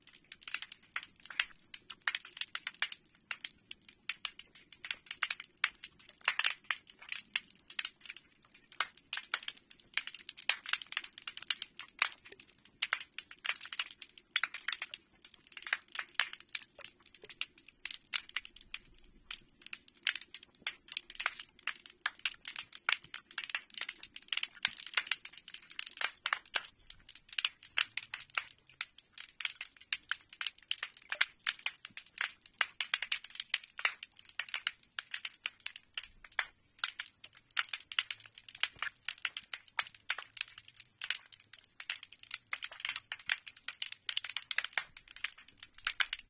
Hydrophone at boatramp 1
The sound of marine snapping shrimp in the ocean at an Australian boat-ramp. This uses a home made hydrophone, see comments.